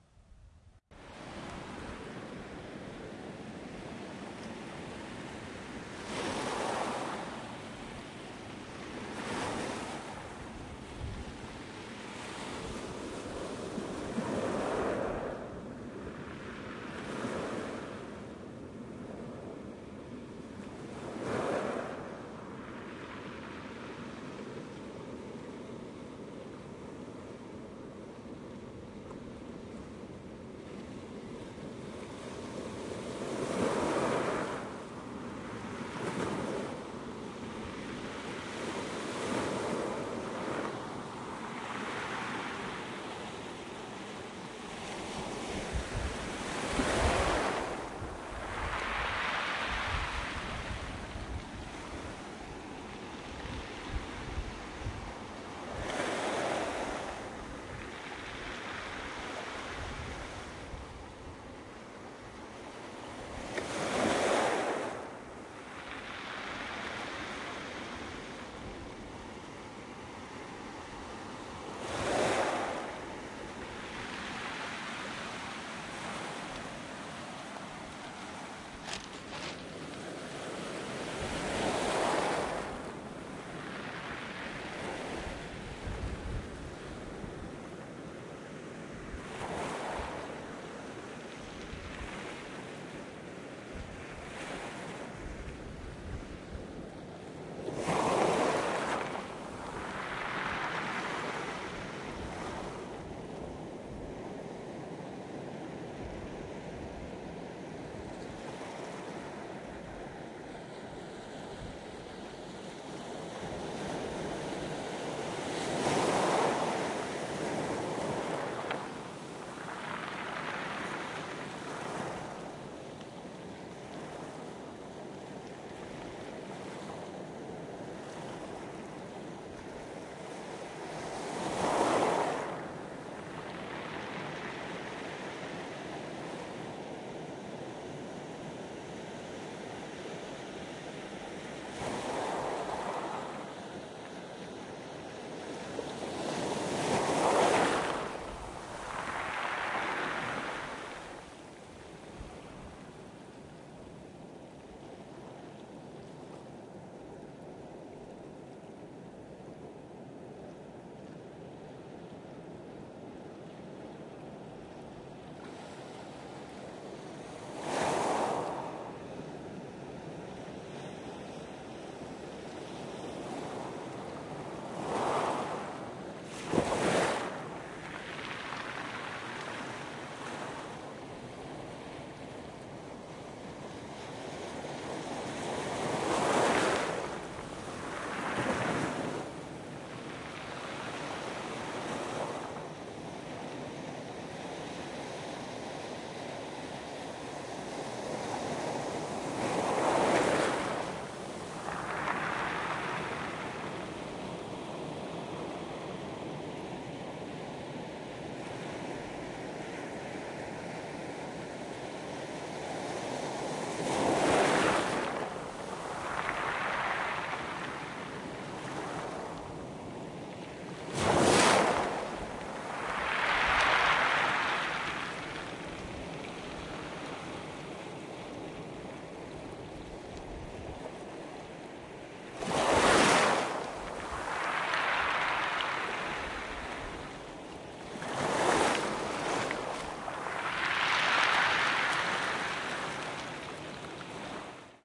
Easter Island 5 minute MD recording of waves crashing against volcanic ro~1
A recording of the wild Pacific swell crashing down on a small beach of black volcanic rock on the very isolated and very special island, Isla De Pascua - Chile.
pacific-ocean, waves